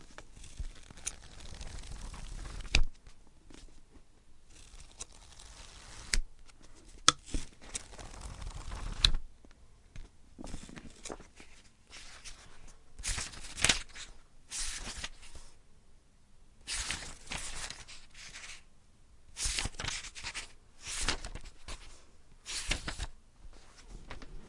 Flipping through a book
Book, Flick, Pages